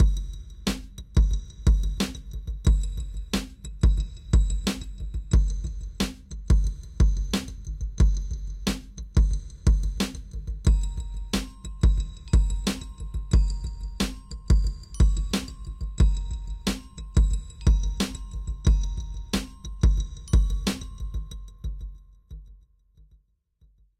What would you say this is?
Hip-Hop loop #13
Rap beat loop with bells by Decent.